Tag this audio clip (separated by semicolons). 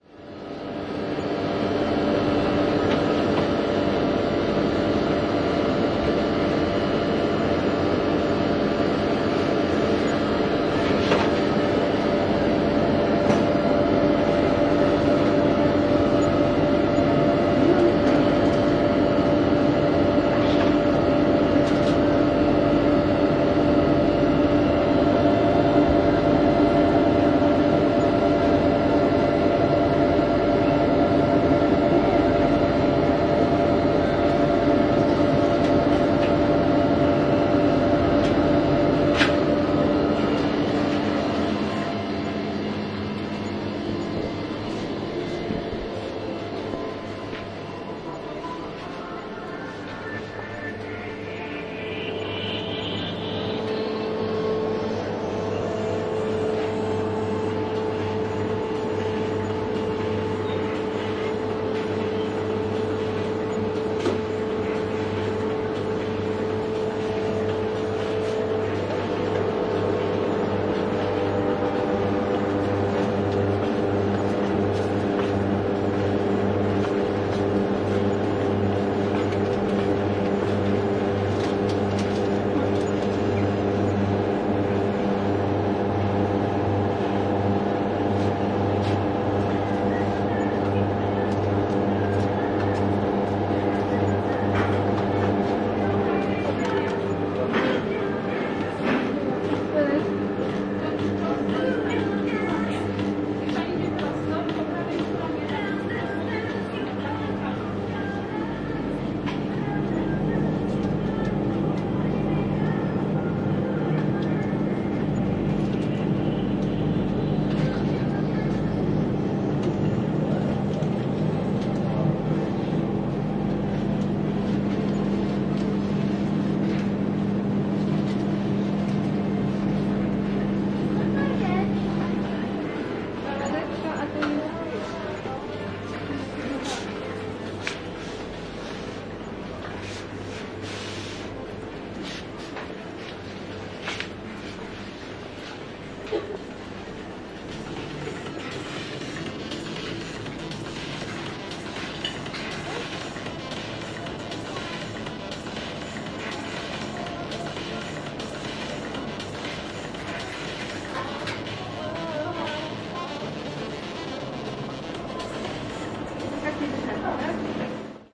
fieldrecording; noise; Os; soundwalk; shop; Sobieskiego; Pozna; cooler